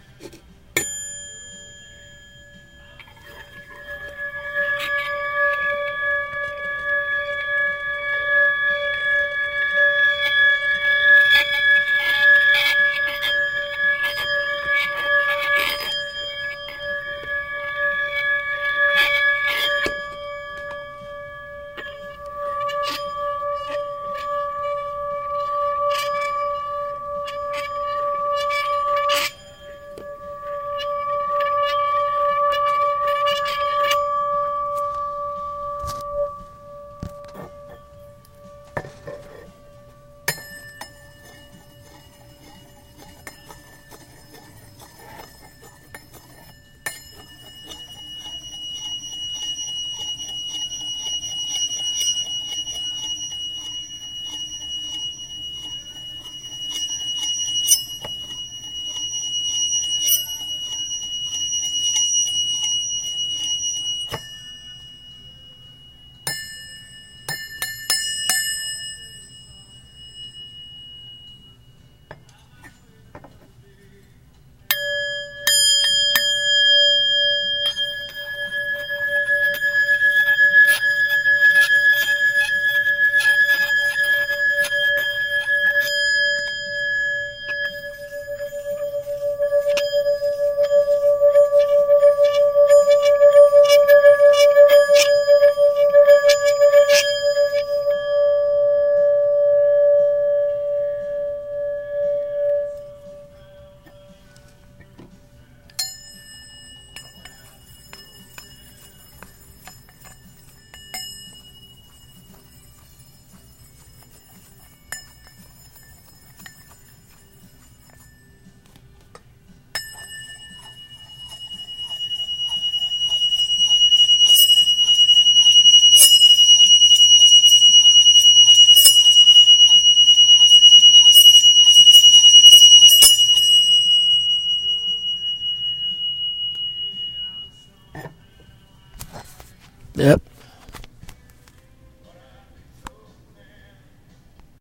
Striking a silver goblet then making it sing by rolling a wooden dowel around its edge. The first part is the top of the goblet (lower pitch) and the 2nd part is the bottom base (higher pitch). Followed by various strikes and rolls. Microphone: iPhone 3G
bell, chime, circular, glass, goblet, metal, ring, singing-bowl, strike